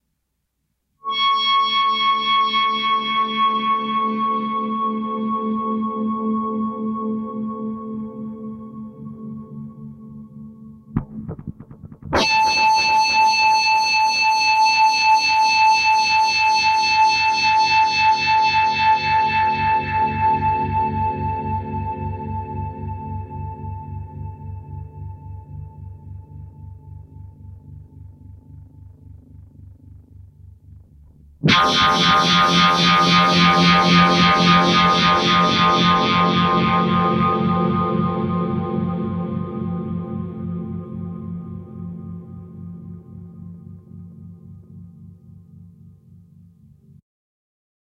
quantum harmonic spaced
A noise, a harmonic, from somewhere beyond space....then an ugly sound.